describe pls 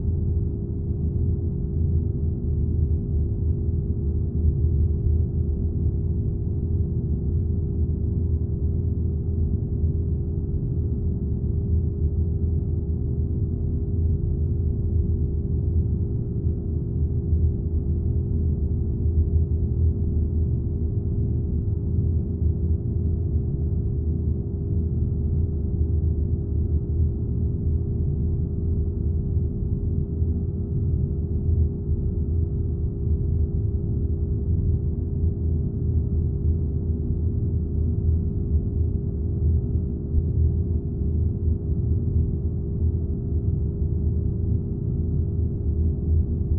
industrial hums factory water treatment plant drone highcut
drone, factory, hums, industrial, plant, treatment, water